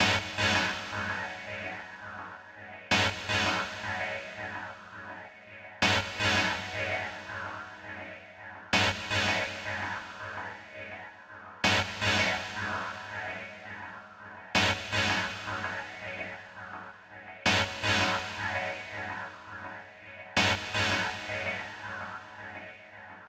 dnb phasin chord (consolidated)
delay, chord, atmospheric, sample, phaser, modulation, reverb, sampler, ambient, fl-studio, dnb, jungle, drum-and-bass